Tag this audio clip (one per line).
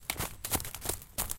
nature
foot
steps
forest
field-recording
footstep
walk
footsteps
walking